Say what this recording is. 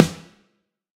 Processed real snare drums from various sources. This sample mixes typical snares from both the eighties and nineties.
drum, real, sample, snare